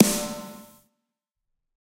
Snare Of God Drier 024
kit, drumset, snare, drum, set, realistic, pack